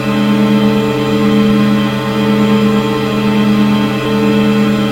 Created using spectral freezing max patch. Some may have pops and clicks or audible looping but shouldn't be hard to fix.
Background, Everlasting, Sound-Effect, Atmospheric, Still